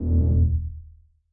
A low alarm or alert sound with reverb.
big, warning, bass, low, alert, alarm